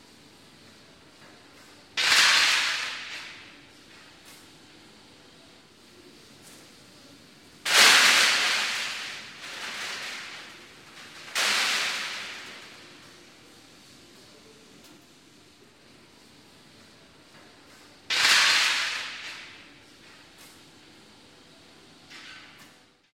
Metal dropping into scrap bin 1
Metal offcuts dropping into scrap bin